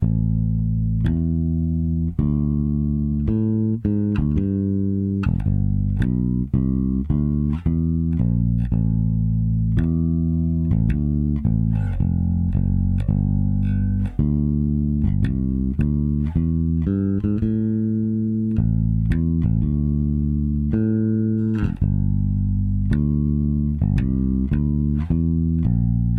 Bass - 2 Feel
A 12 measure, 110bpm two feel bass line with 6-2-5-1 progression in C Major